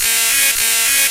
bangbook-with delay
digital, noise